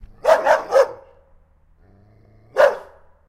Dog Bark Light Agressive
Mad barking dog.
agressive, angry, Bark, barking, Dog, growl, growling, hissing, mad, upset